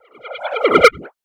Sinus double phase 2 echo invert
Ideal for making house music
Created with audacity and a bunch of plugins
acid, fx, house, ping, quality